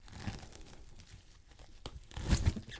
Multiple tears 2
Some gruesome squelches, heavy impacts and random bits of foley that have been lying around.
splat, blood, vegtables, gore, foley, violent